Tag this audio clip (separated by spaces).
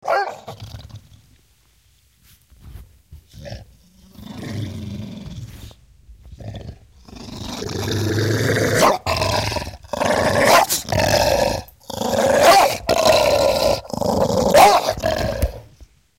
perro mascota